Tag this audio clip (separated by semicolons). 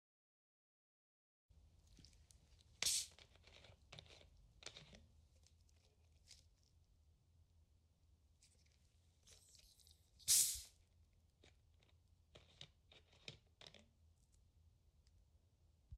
bottle; carbonated; drink; gas; open; opening; OWI; soda